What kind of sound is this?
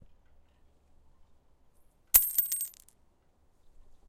dropping coins on stone floor